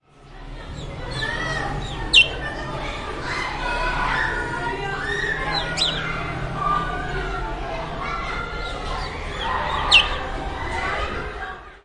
abouttheschool-49GR-Linosthebird
49th primary school of Athens: whistling dialogue between Sofia and Linos the bird.
49th-primary-school-of-Athens, about-the-school, bird, buzzing, cars, dialogue, Greece, TCR, whistle